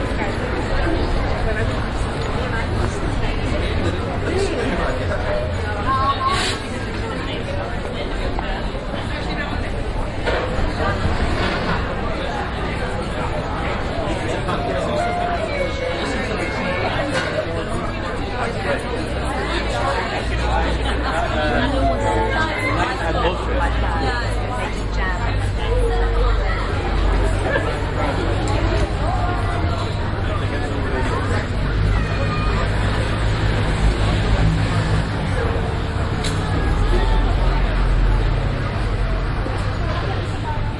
Russell Square - Dining at Carlucci in the Brunswick
ambiance, ambience, binaural, field-recording, london